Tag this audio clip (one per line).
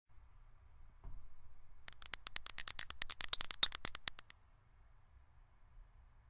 Bell
ringing
ring